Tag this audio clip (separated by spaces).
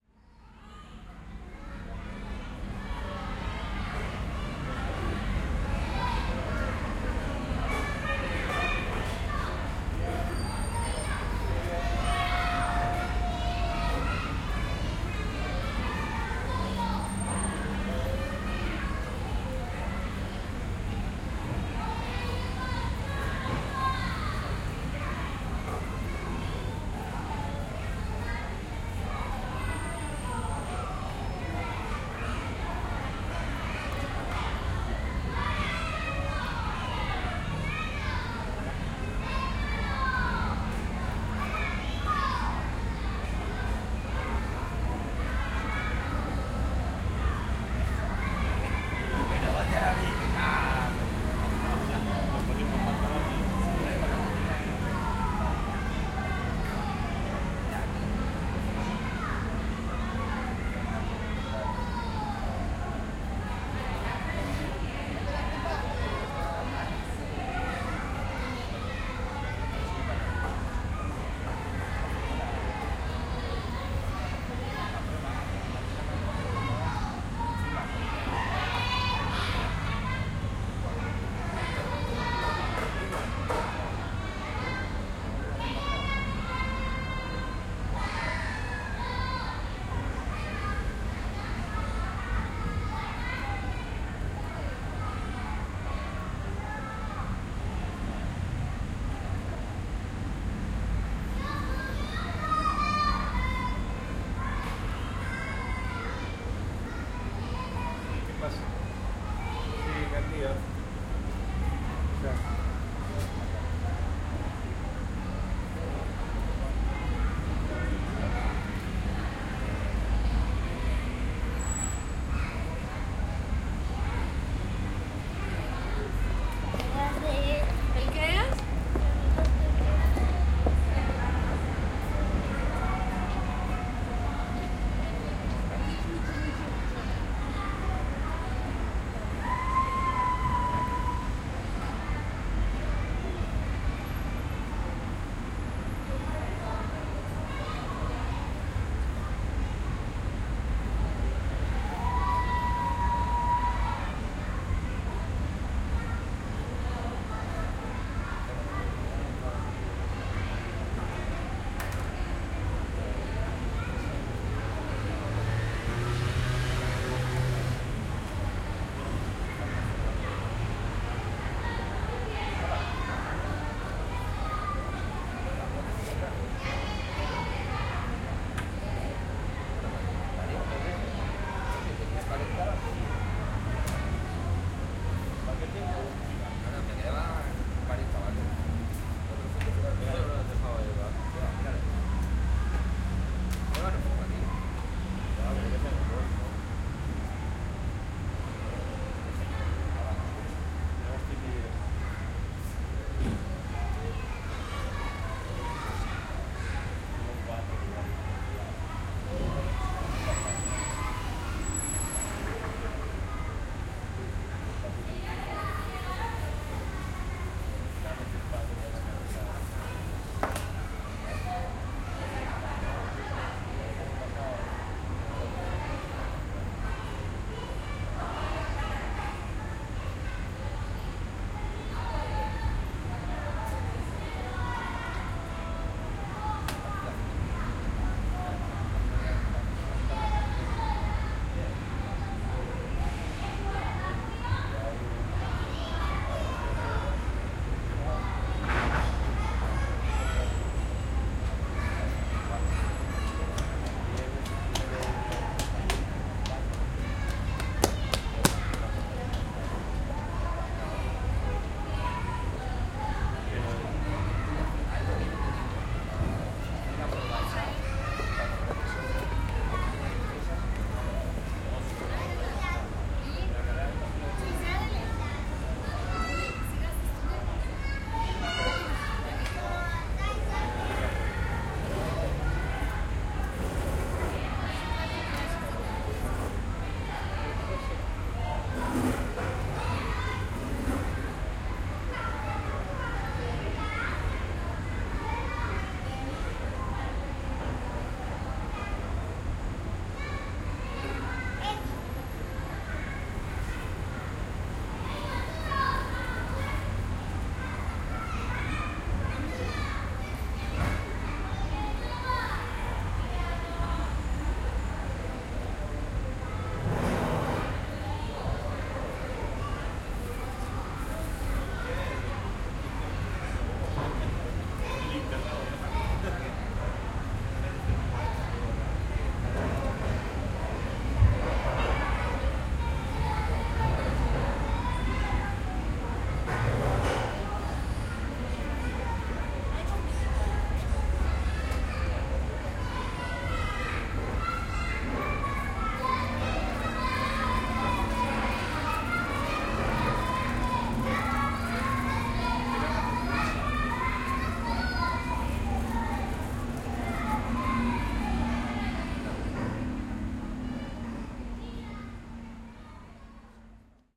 footsteps cars